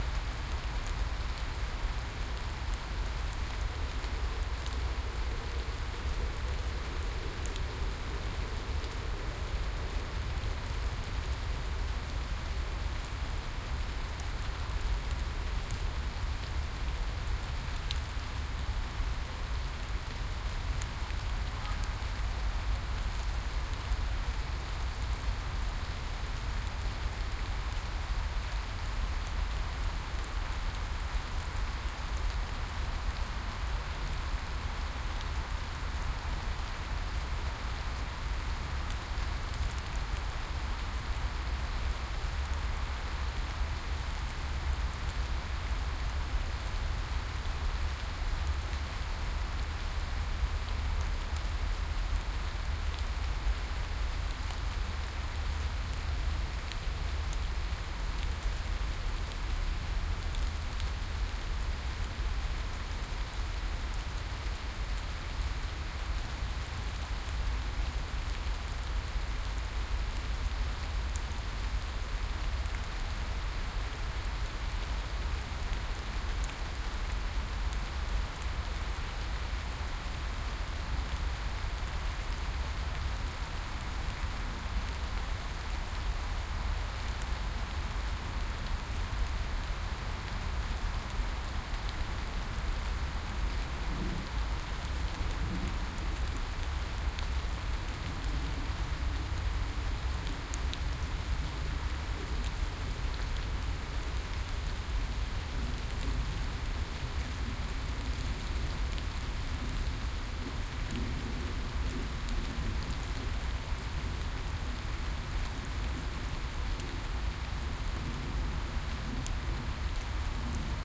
Rain in Forest
The Eilenriede
is a fairly large forest in Hanover and on that Sunday in August it was
raining. One can hear the rain, some distant traffic and a plane
overhead. All that was recorded with a Sharp MD-DR 470H minidisk player
and the Soundman OKM II.